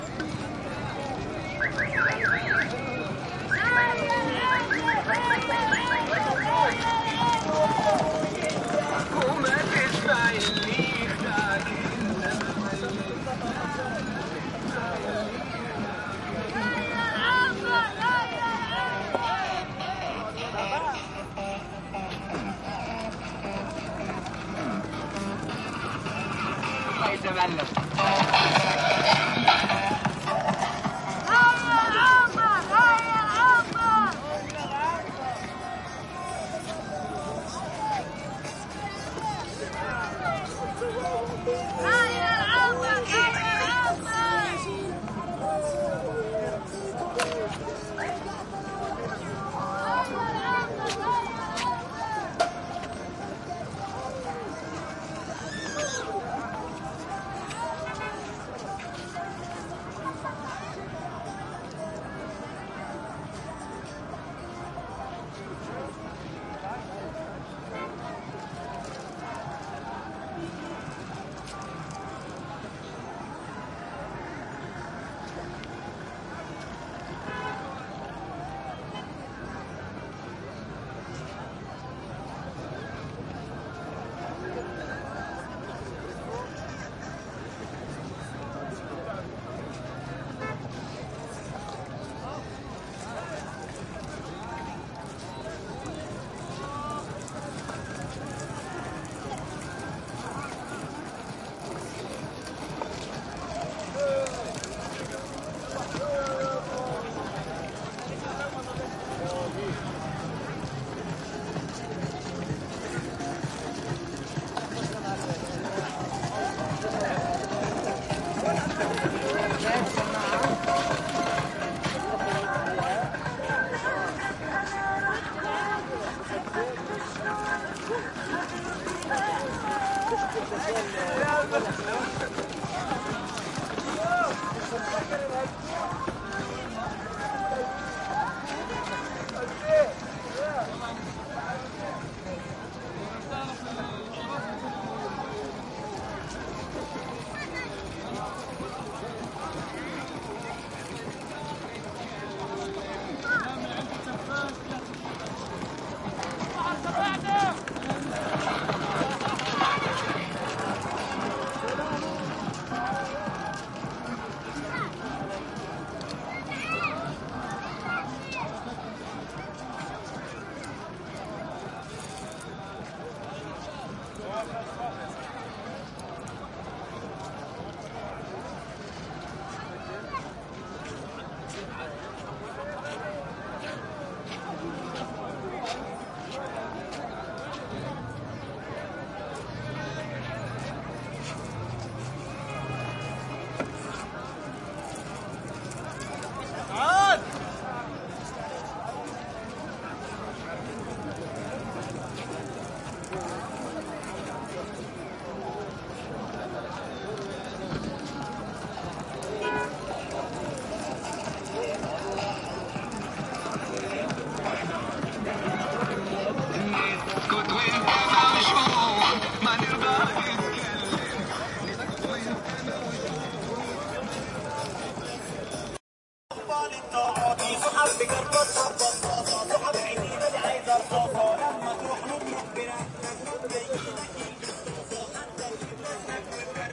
street sandy marina promenade nightlife light crowd arabic grainy steps cars motorcycles throaty mopeds pass1 with pimped out LED power wheels cars blasting arabic pop music Gaza 2016
arabic, cars, crowd, grainy, light, marina, mopeds, motorcycles, promenade, sandy, steps, street